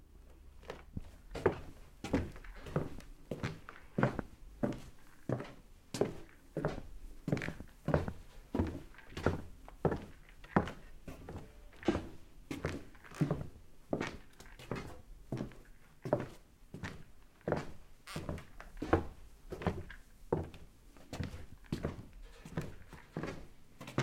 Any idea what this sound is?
Walking On A Wooden Floor
wooden-floor, footstep, walking, shoes, ground, stepping, feet, step, footsteps, wood, foot, floor, walk, steps